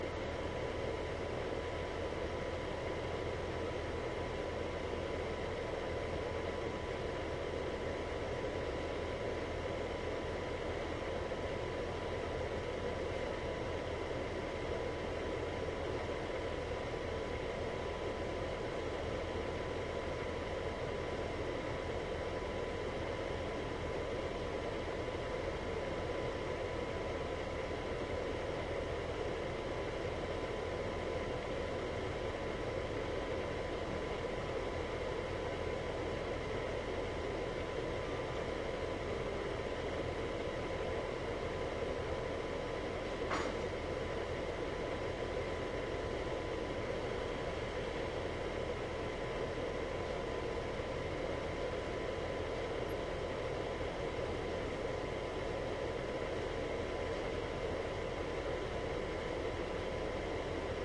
ventilador de mesa 2
air fan noise, ruido de ventilador de mesa
abanico, air, appliances, fan, ventilador